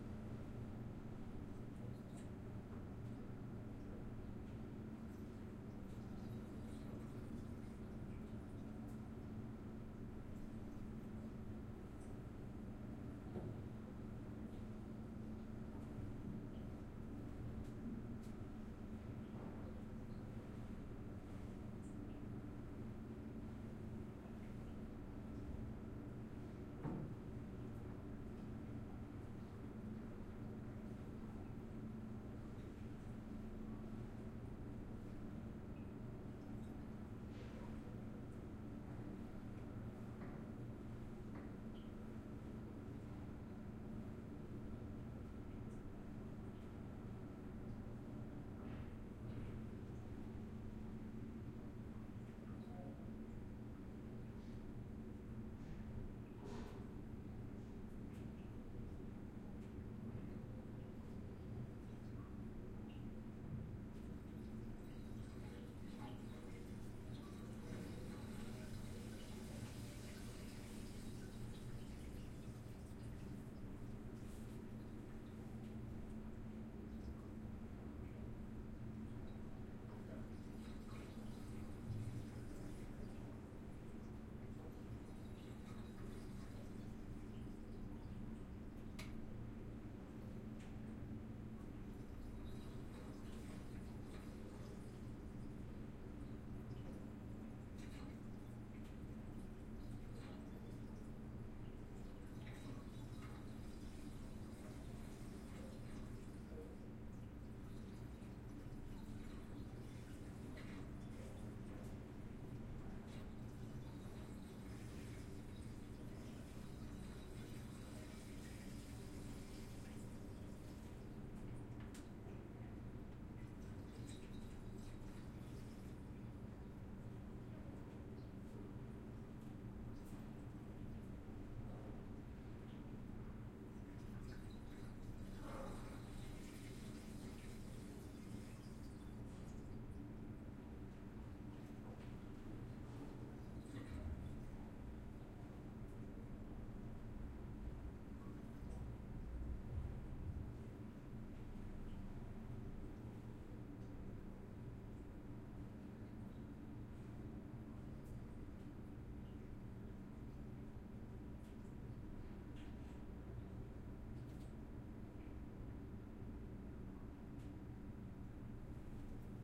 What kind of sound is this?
swimming pool, under the tank , flows of water
Strasbourg in the old Public bath basements. the sound of the swimming pool, under the tank.
Stéréo ORTF Schoeps